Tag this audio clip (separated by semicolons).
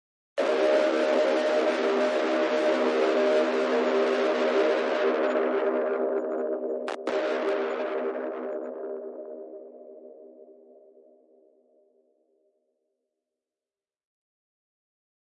amp; corpus